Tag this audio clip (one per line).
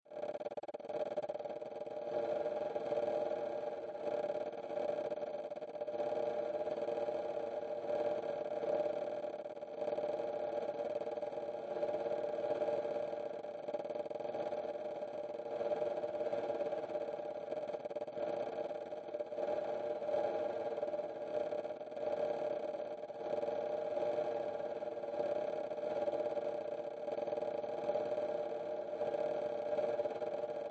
ambience
ambient
atmosphere
electronic
live
loopmusic
max
noise
sound
synth